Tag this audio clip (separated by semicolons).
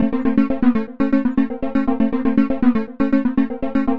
synth; grains